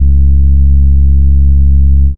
SUB BASS 0101
SUB BASS SUBBASS